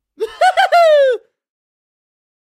Funny Laugh
Me + AKG C1000S + Reason 6.5
A little laugh to bring in 2013!
Joy, Dry, Animation, Laughter, Sound, Humorous, Vocal, Laugh, Humour, Original-Sound, Funny, Bizarre, Comic, Human, Laughing